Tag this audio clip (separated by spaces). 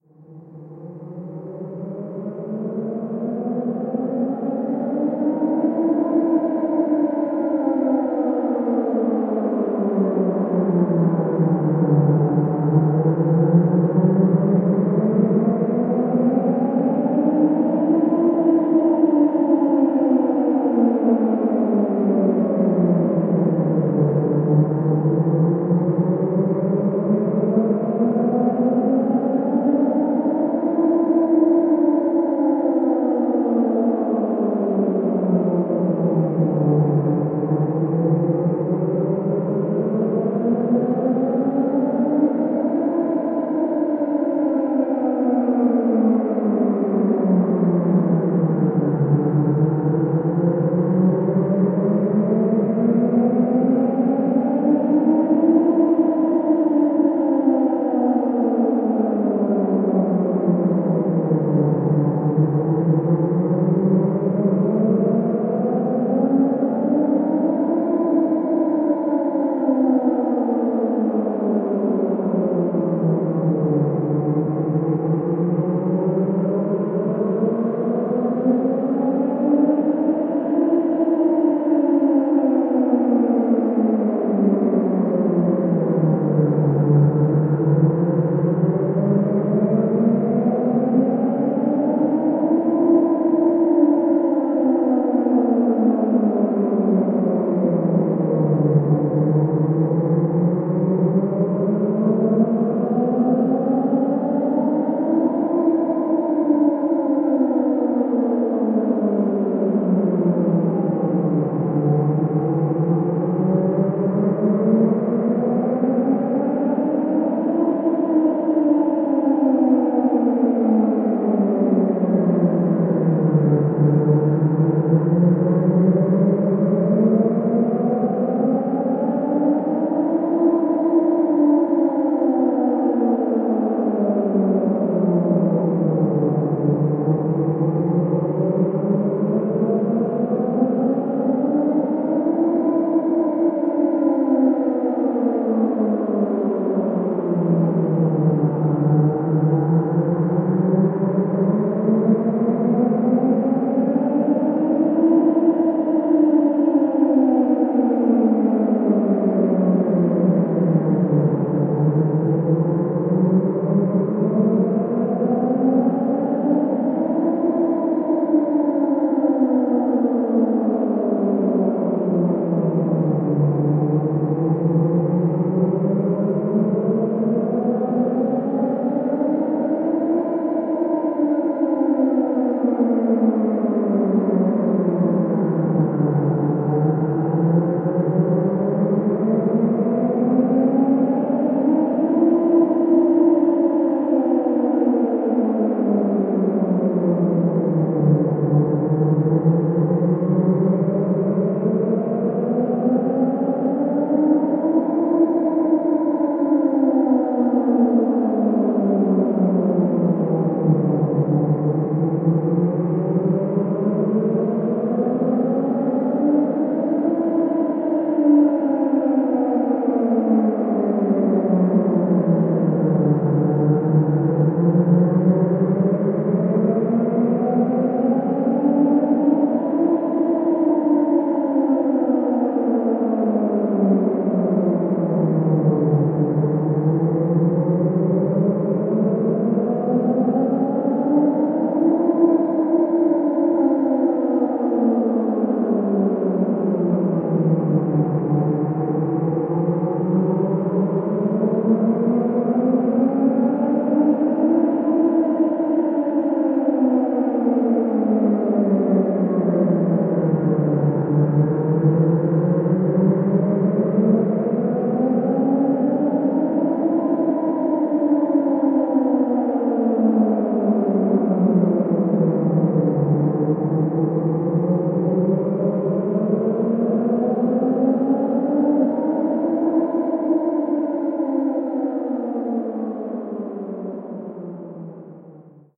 alarm ambulance apocalypse creepy distance haunting scary siren sound spooky unsettling